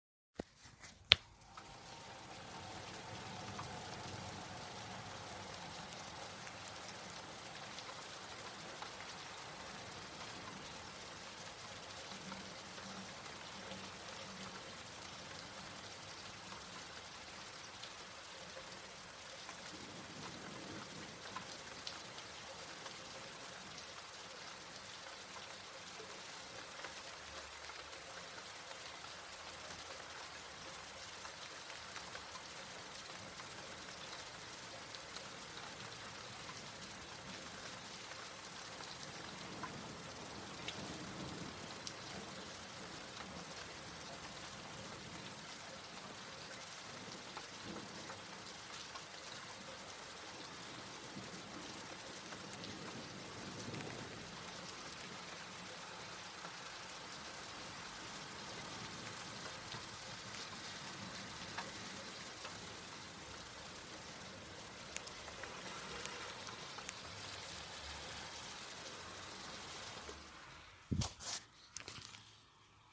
Sounds of rain and thunder, very calming.
Have a great day!
thunder, thunderstorm, rain, raining, weather, lightning, storm, nature